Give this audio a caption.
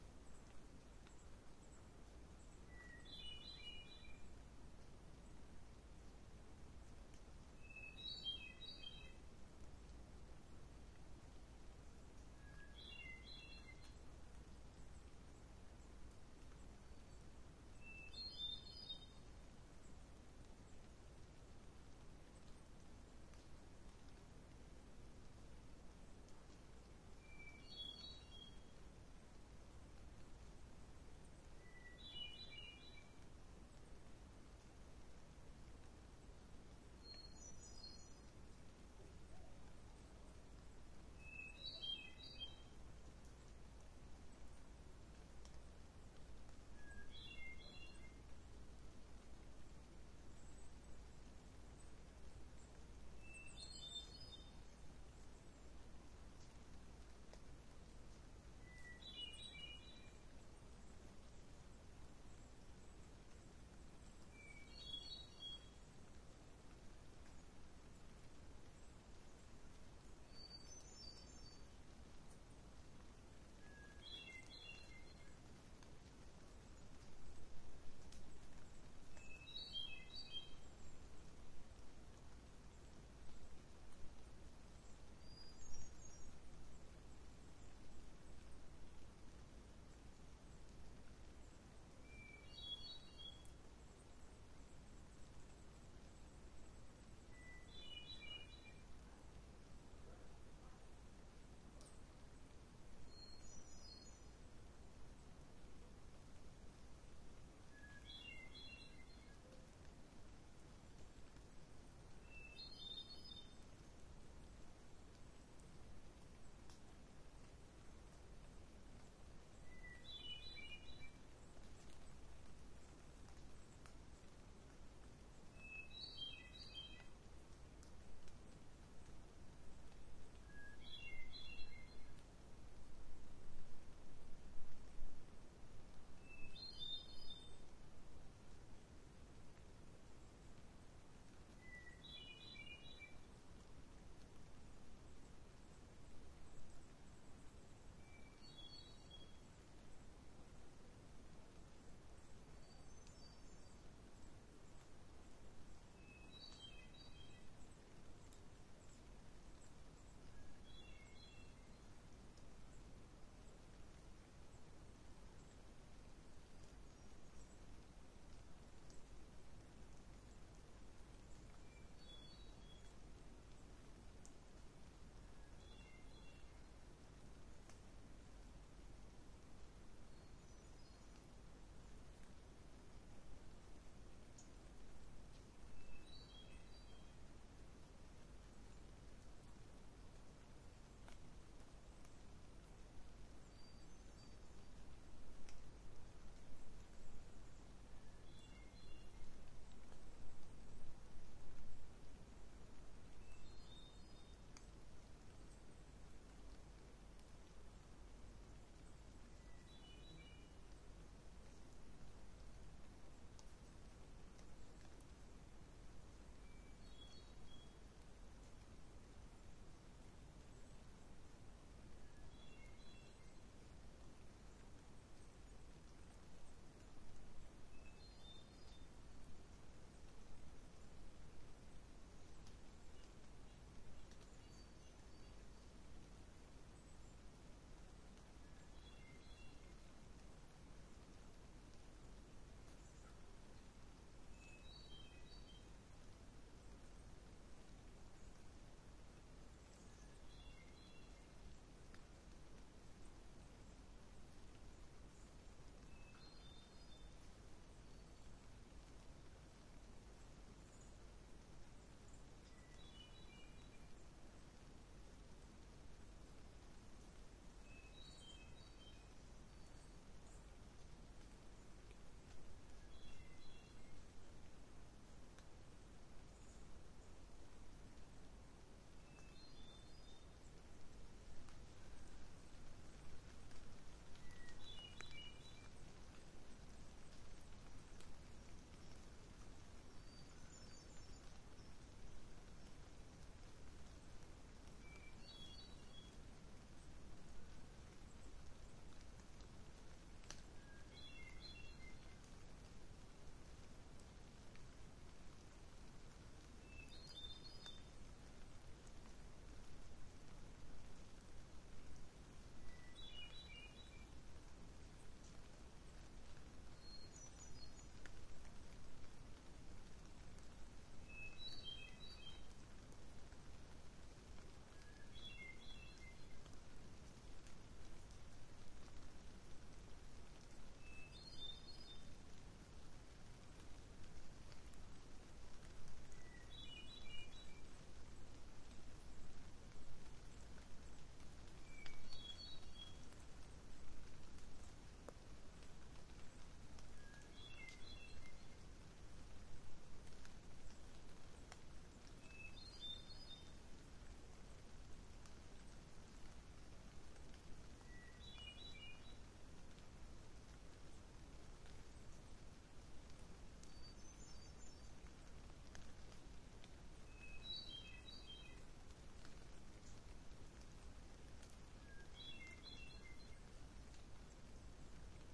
Forest, light rain and wind, bird song. This sample has been edited to reduce or eliminate all other sounds than what the sample name suggests.
bird-song, field-recording, forest, light-rain